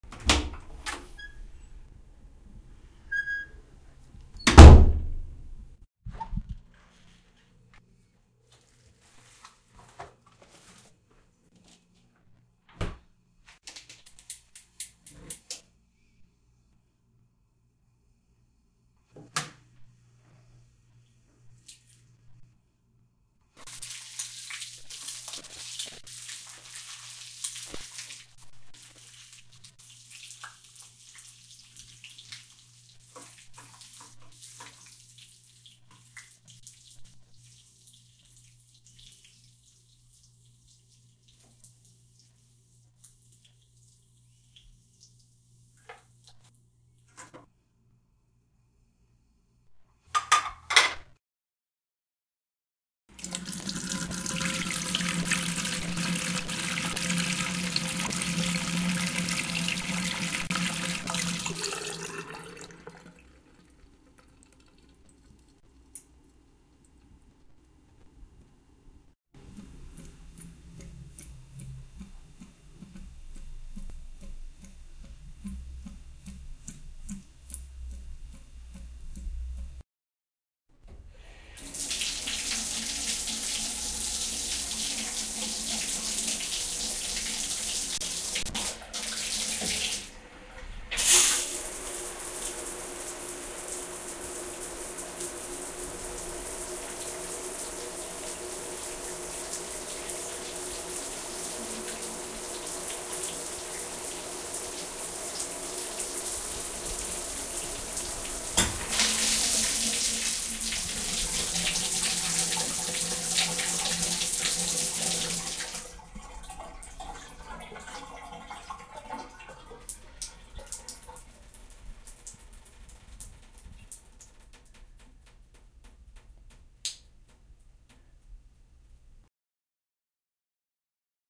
Household sequence: Door open and close, tap running, dishes in sink, shower, drain.